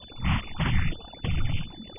minato2smaller
Created with coagula from original and manipulated bmp files. Made from spectrogram of speach.
image, space, spectrogram, synth